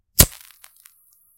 Striking a lighter.